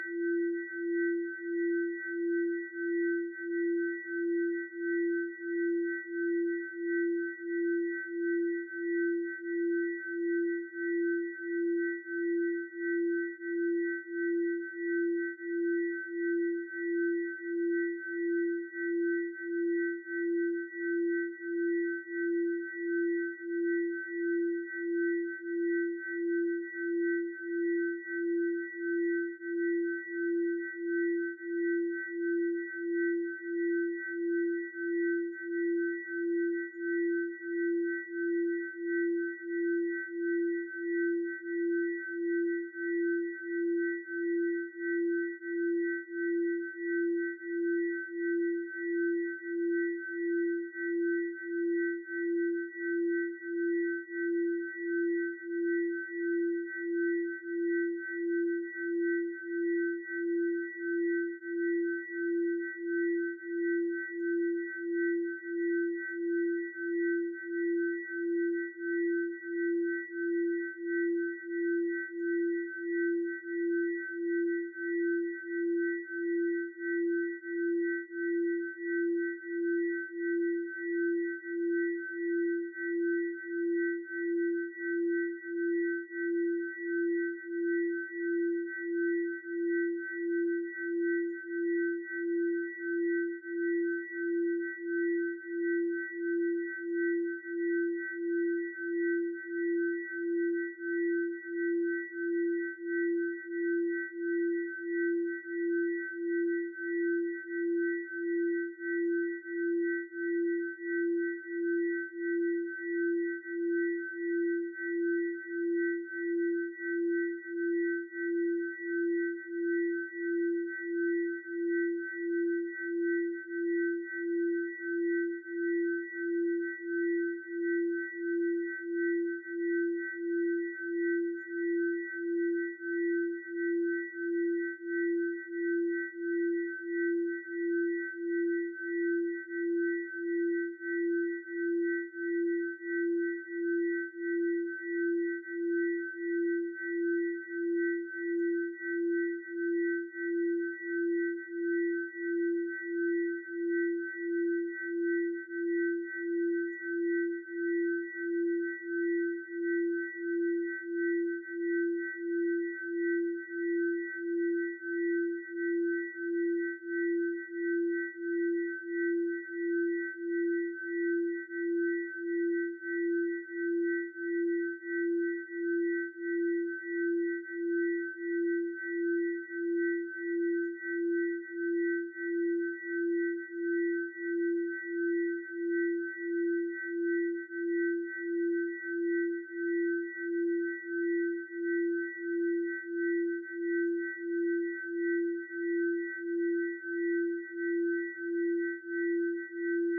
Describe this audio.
Imperfect Loops 15 (pythagorean tuning)
Cool Loop made with our BeeOne software.
For Attributon use: "made with HSE BeeOne"
Request more specific loops (PM or e-mail)
background, pythagorean, ambient, experimental, electronic, loop, sweet